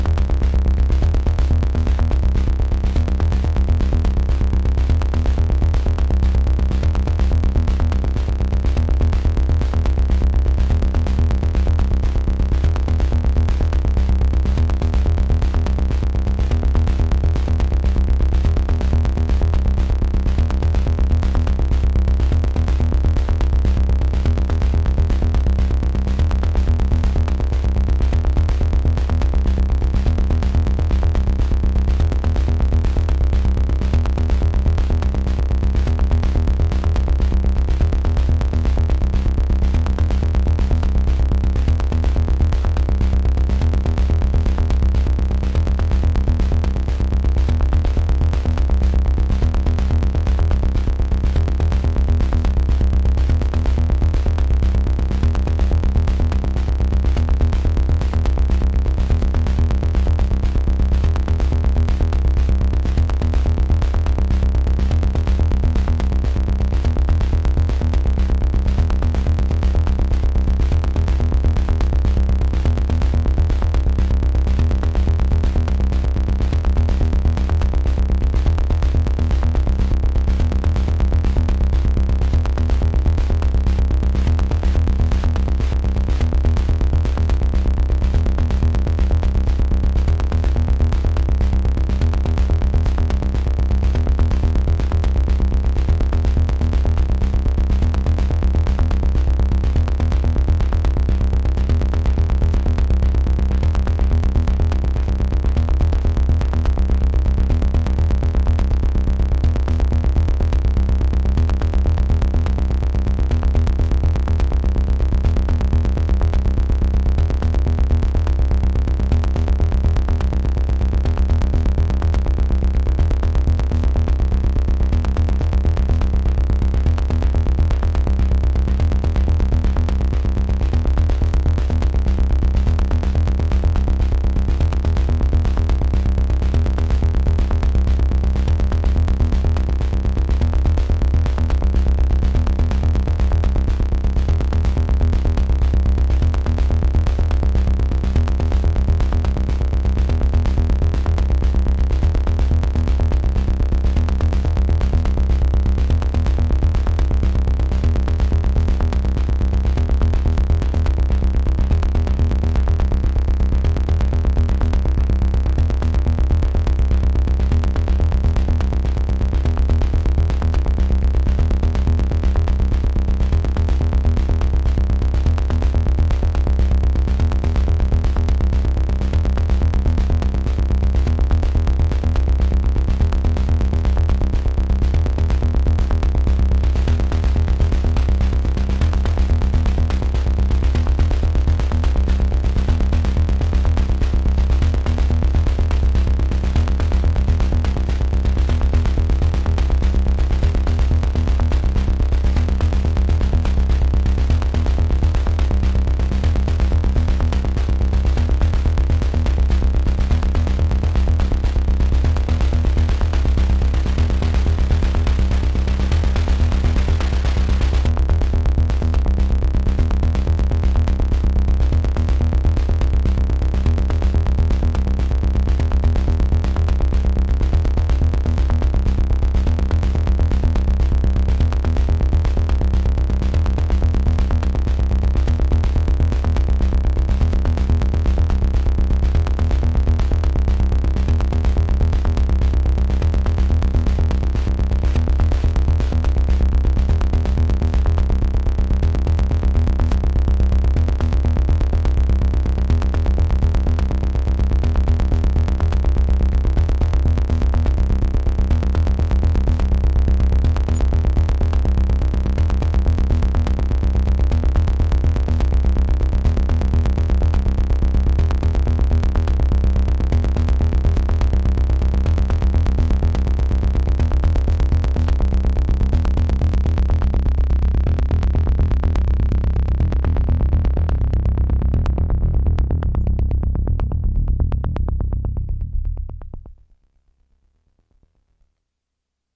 Drones and sequences made by using DSI Tetra and Marantz recorder.